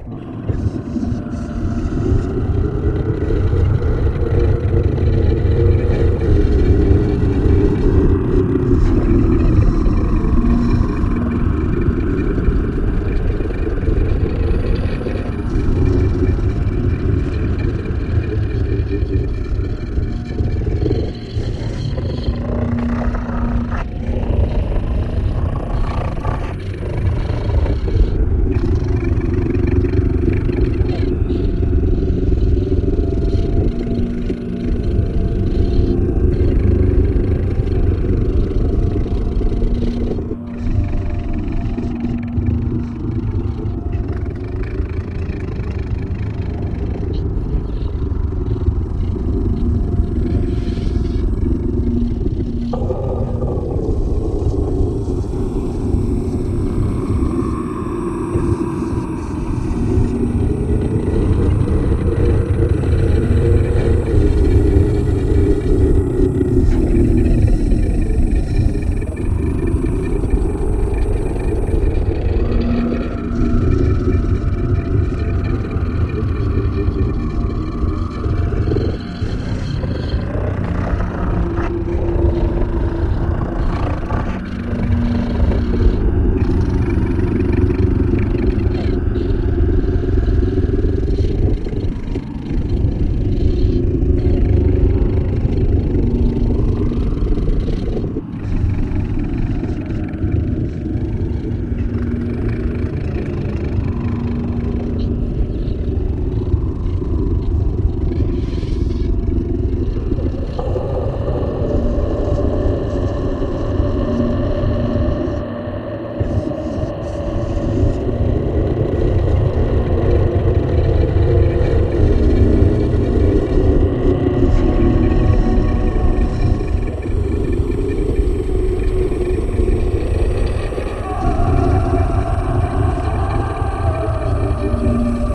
mythological snarling beast
A huge beast threatens humankind.
All done with several tracks of my growling voice (mainly pitched down), a track of me performing overtone singing (a bit amateur I'm afraid) and a track of me playing mouth harp, and a track of feedbak I created with my mic and loudspeakers.
I hope to upload an improved second version with proper overtone signing. Maybe I'll upload an alternative version without the harp and singing.
beast, grunt, snarl, human-voice, epic, growl, enemy, massive, animal, filtered, mythologic